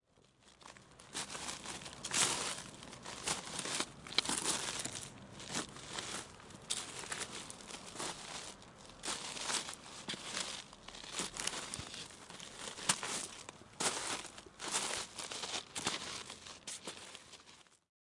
03-Man walking gravel
Man walking on gravel
man, Panska